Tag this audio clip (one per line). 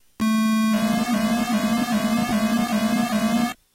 boy
game
layer